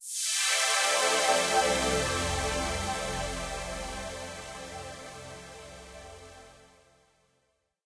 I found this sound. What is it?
Swoosh FX Soft
Synth swooshing sound of a square wave. Suitable for intros or logos. Available in several intensities.
effect, filter, fx, intro, logo, sound, square, swoosh, synth, wave